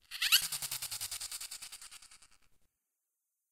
Mechanical Servo Tremolo
A short motor spin SFX with a tremolo and a touch of reverb
engine, factory, start-up, sfx, sound-design, machine, short, mechanical, ignition, electricity, noise, sounddesign, start, strange, futuristic, future, generator, sound, machinery, robot, electric, robotic, power, industrial, tremolo, weird, sci-fi, cinematic, motor